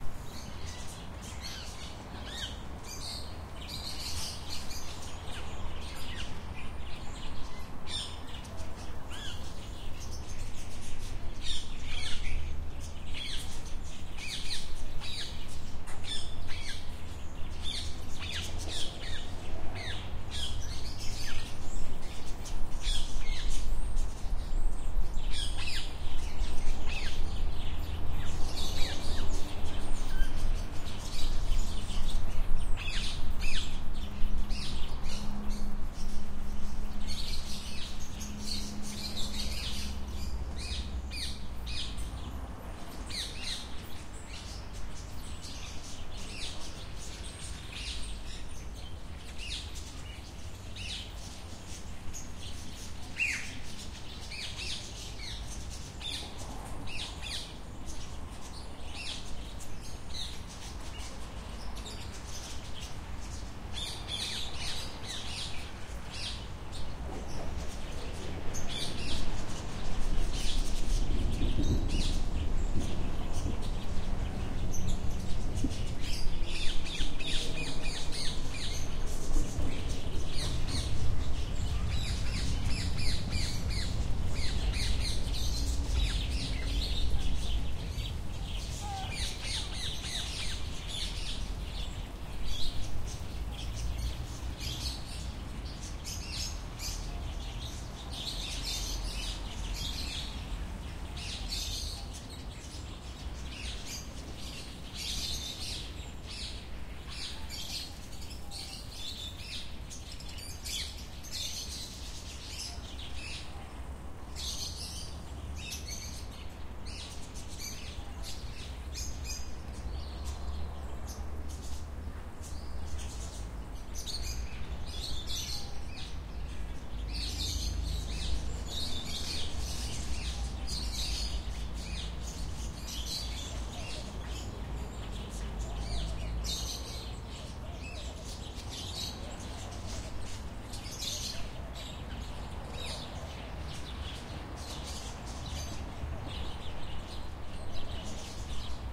In the small zoo at summer. Park naturalists. Center of city. Tweet. Noise of cars and tram on the road. Somebody talks on the mobile phone. Sound of thunderstorm incoming.
Recorded: 25-07-2013.
XY-stereo.
Recorder: Tascam DR-40
atmosphere, mammal, noise